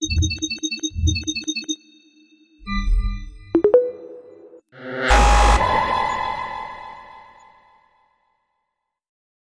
Bad choice Sound